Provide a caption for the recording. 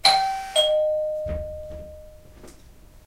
a simple door bell